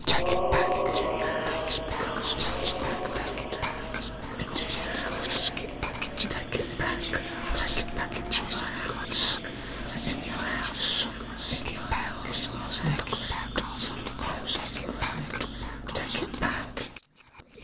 The voices say to take it back.